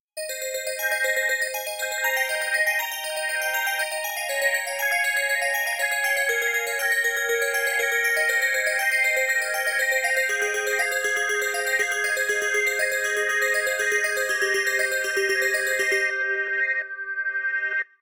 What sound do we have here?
ARP B - var 4
ARPS B - I took a self created Bell sound from Native Instruments FM8 VSTi within Cubase 5, made a little arpeggio-like sound for it, and mangled the sound through the Quad Frohmage effect resulting in 8 different flavours (1 till 8). 8 bar loop with an added 9th bar for the tail at 4/4 120 BPM. Enjoy!
120bpm, arpeggio, bell, melodic, sequence